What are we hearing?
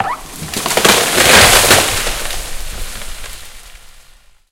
A Tree Falling Down
We were cutting down giant trees the other day on our farm, and I recorded this amazing sound. A great, clean recording!
forest, flora, tree